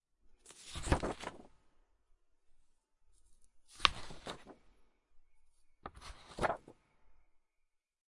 Paper Sketchbook Page Flips 2
Flipping through the pages of a heavy stock paper sketchbook.